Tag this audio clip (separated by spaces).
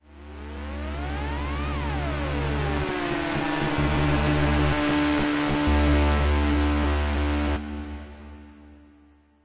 drone FX industrial sci-fi